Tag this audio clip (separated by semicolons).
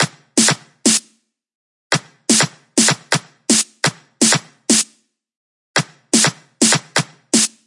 house; minimal; techno